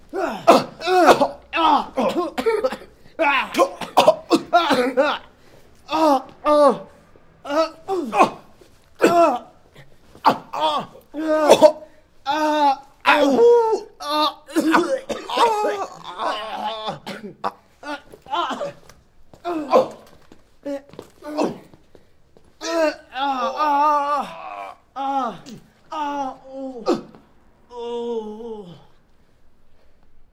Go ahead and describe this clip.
2 men being hit ouch painful comic yelling beatdown
beatdown, hit, ouch